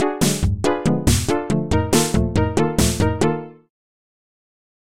Hmasteraz OCL-01 ObssessiveComplusiveLoopage I
Some weird little loops made in FLS6... Kind of a mix between a simple slowed down dance beat and some odd percussionate sounds.